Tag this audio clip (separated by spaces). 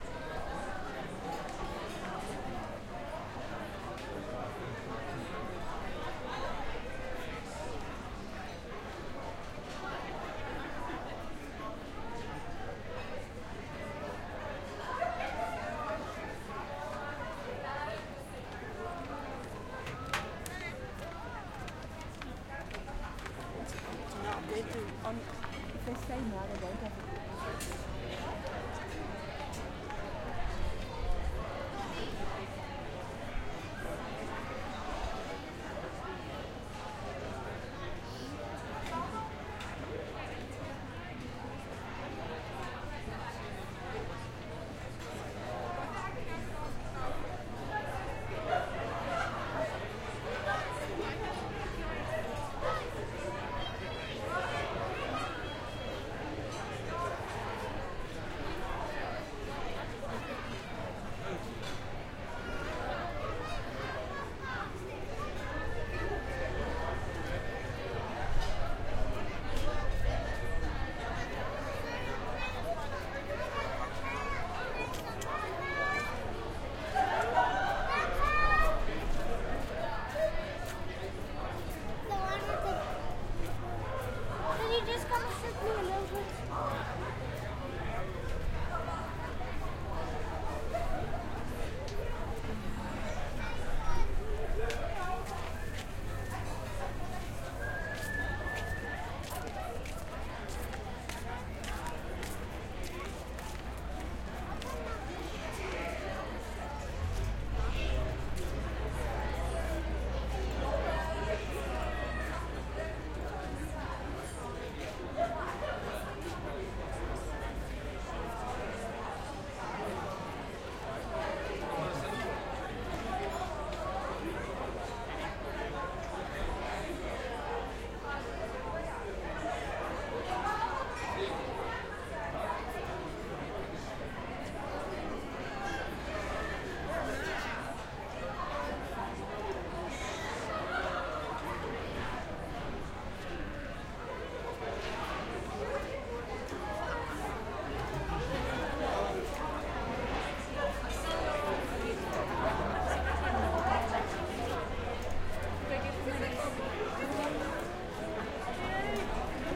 Animals chatting deck dining dishes Farm-yard jolly Livestock OWI Peaceful people plates play Play-ground silverware